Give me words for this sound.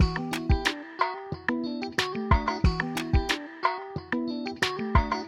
Em 91-bpm Low Key Guitar Groove B
I created the beat using Ableton. I recorded the guitar part on my Fender Strat, useing warping Ableton to tighten up my playing. I used a Peavey mic (away from comp will check model when I get home) going to a fast track ultra audio driver.
91-bpm, beat, drum-loop, drums, latin, loop